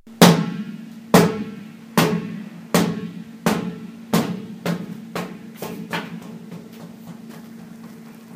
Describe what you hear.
Large exercise ball bouncing several times with diminishing amplitude.